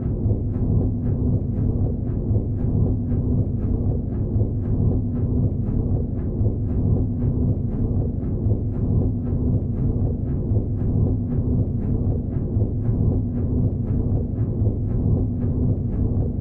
Spooky dungeon machine 1(4lrs)
The sound of a muffled creepy dungeon machine. You can imagine hearing it through a small window in the masonry. Enjoy it. If it does not bother you, share links to your work where this sound was used.
Note: audio quality is always better when downloaded.